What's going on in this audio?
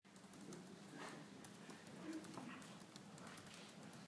Library's atmosphere.
Recorded with a H4N recorder at the university's library.
Edited with Adobe Audition CS6 (2009) : I cleaned the noise and deleted some unnecessary parts.
Recorded in Madrid, Universidad Europea de Madrid Campus de Villaviciosa de Odon, 20/Octubre/2015 at 1:15 pm